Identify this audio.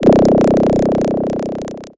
Kicking-effect-5
Glitched percussion rhythmical effect
glitch-effect, glitch, 8-bit, rhythmic-effect, kicks